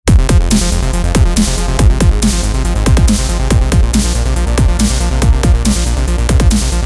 90's Game Loop
Clean sounding retro loop. Created within Logic Pro X.
arp, synthesizer, music, beat, rhythmic, F, drums, loops, pad, key-of-F, synth, heavy, electronic, retro, loop, clean